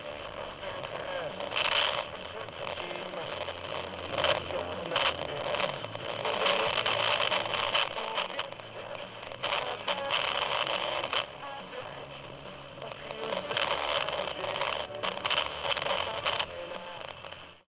Lo-fi AM/FM radio (Distorted bass signal)
Lofi radio sound recorded with 3 EUR cheap radio unit.
Recorded with TASCAM DR-22WL.
In case you use any of my sounds, I will be happy to be informed, although it is not necessary.
am, distortion, electronic, field-recording, fm, frequency-sweep, glitch, industrial, lo-fi, lofi, noise, radio, shortwave, static, transmission